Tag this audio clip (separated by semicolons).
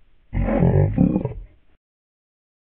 Words; Slow-mo; Voice; Large; FSX; Effect; Strange; FX; Gross; grown; Growl; Down-Pitch; Mo; Beast; Slow; Distorted; Unintelligible; Grumpy; Deep; Detune; Pitch-Down; Pitch; Odd; Human-Voice; Robotic; Human; Thick